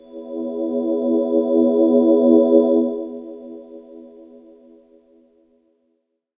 a thin "Jupiter 8" pad
analogue, 80s, jupiter